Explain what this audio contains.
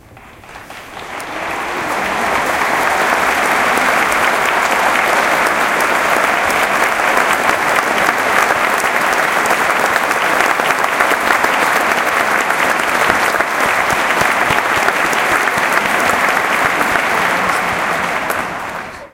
This recording was taken during a performance at the Colorado Symphony on January 28th (2017). Recorded with a black Sony IC voice recorder.